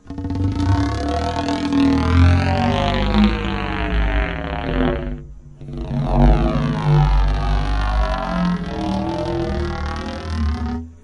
Dragging a stick along a corrugated plastic pool hose with the microphone in the opening/end.
pool-hose, corrugated